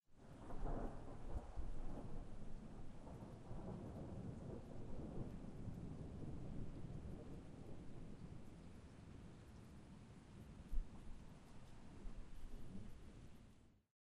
AMBIENT - Rain - Far Away SoftThunder
Distant thunder rumbles softly, quite muffled and unclear
Deep and slow, a very low rumble spreading through the sky.
Deep Crackling, rumbling and rolling
Recorded with Zoom H4 Handy Recorder
weather, crackle, thunder, bass, soft, rumble, deep, nature, outdoors, field-recording, muffled